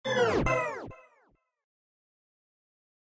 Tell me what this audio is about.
I used FL Studio 11 to create this effect, I filter the sound with Gross Beat plugins.